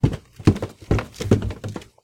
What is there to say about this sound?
Footsteps-Stairs-Wooden-Hollow-07
This is the sound of someone walking/running up a short flight of wooden basement stairs.